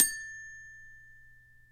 Multisample hits from a toy xylophone recorded with an overhead B1 microphone and cleaned up in Wavosaur.
instrument, multisample